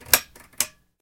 This is one of those pull chain style lamps like you mom had in her living room. Relive the glory days of an actual switch being moved by an actual chain!
chain, lamp, off, pull, slow